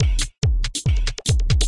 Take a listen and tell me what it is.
loop2 140bpm
A simple 1 bar loop with an electro feeling
electro, beat, 140bpm, loop